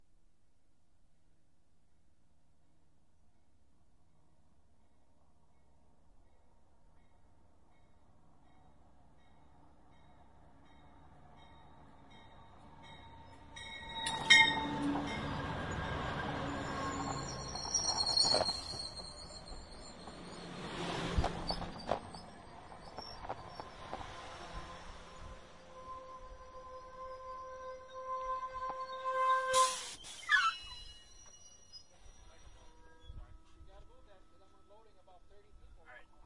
amtrak hiawatha stop - no mic yank
Recorded at the Milwaukee, WI Amtrak Station on April 28,2006 while waiting for my friend to arrive from Detroit, MI. For this recording I used a Sony DAT recorder and a Sony hand-held stereo mic laying on the balast (rocks) about a foot from the rail. Unfortunately, the recording is cut short due to the train stopping so that the door was about 2 feet from the mic. You can hear the conductor telling me that I have to "move that thing" because I was in the way. The clicking you hear is the mic bouncing on the balast as the train shakes the ground. Next time I will set the mic on something soft to prevent that.
ambent, milwaukee, railroad, amatrak, train, airport, passenger, field-recording